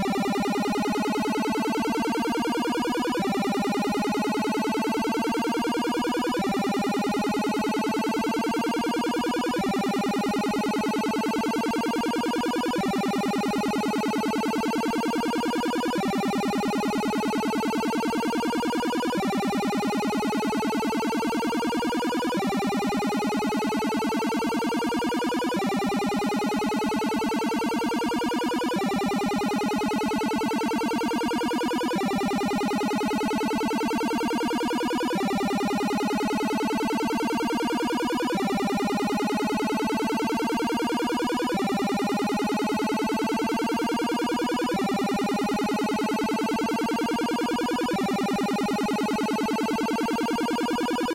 Engine revving chiptune
A short, dramatic engine rev - and it's chiptune!
Made in Beepbox!
Want to use this sound? Go ahead!
Please tell me if you use this, I'm interested in how they've helped!